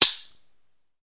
Sound of a toy gun;
Microphone: Huawei Honour U8860 (Smartphone);
Recorder-App: miidio Recorder;
File-Size: 28.5 KB;